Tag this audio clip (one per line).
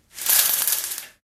bedroom
close
curtain
closing
window
opened
squeeky
opens
door
wooden
closes
slide
sliding
discordant
swipe
opening
blinds
squeaky
portal
open
squeak